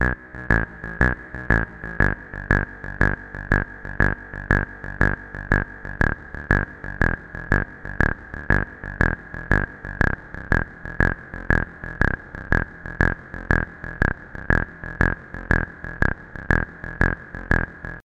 This is the main-bassline that you need, if you wanna create or mix the electro-track "Happy Siren". This bassline fits to the next two Synths!!!
120, bassline, bpm, delay, electro, electro-house, house, minimal, synth